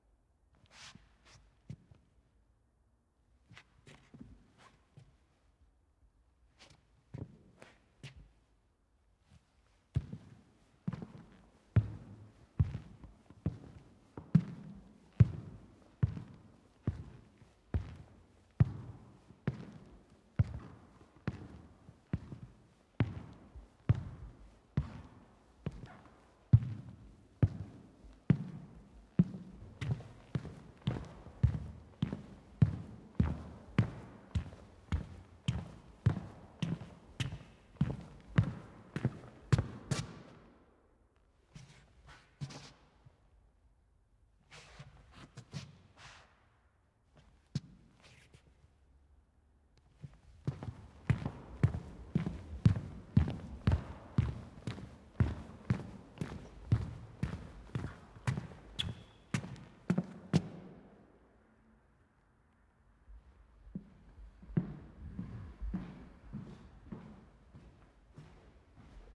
Steps Indoor medium soft Shoe Sole accompanying wooden Floor hollow Big Room 6mx15mx6m Part 2
Steps Walking Indoor
Indoor, Steps, Walking